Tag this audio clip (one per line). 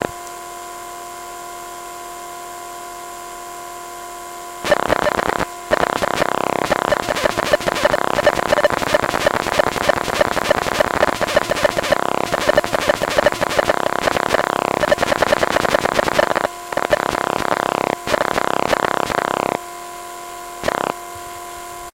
buzz
coil
field-recording
pickup
electro
telephone
magnetic
bleep